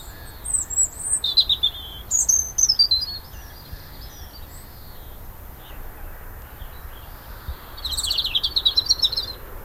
The song of an robin recorded 8th March 07. Minidisc recording. In the background is the sound of a greenfinch as well as wildfowl at the reserve where this was recorded - Fairburn, England.